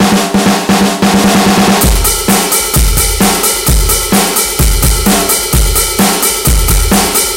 Rock drum loop
Just me messin' around on my e-drums.